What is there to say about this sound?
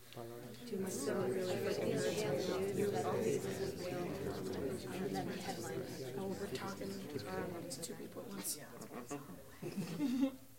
Small crowd murmuring again